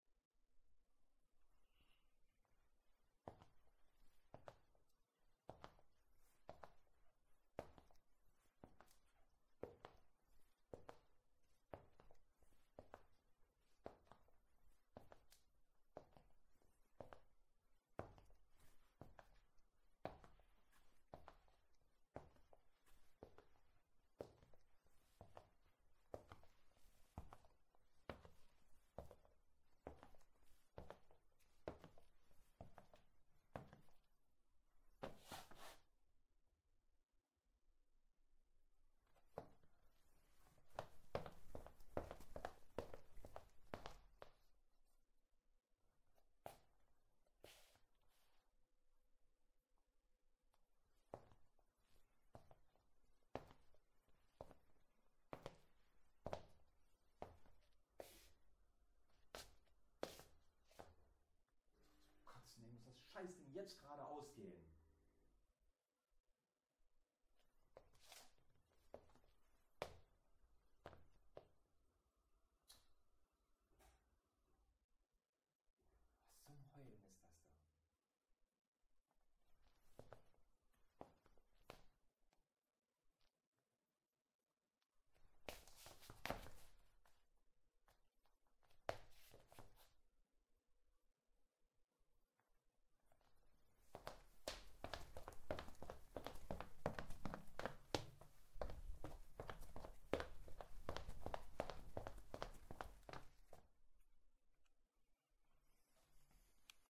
Recorded it with a Tascam DR 05. Walked in my living room with shoes, different speeds.